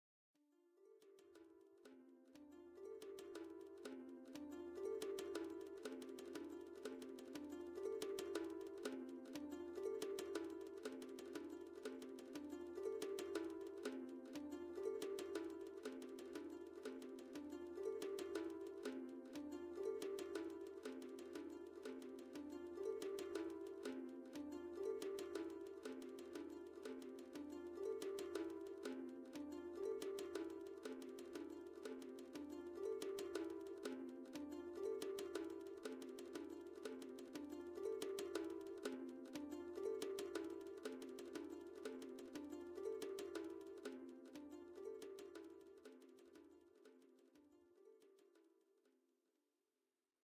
bilateral loop
bilateral, rhythmic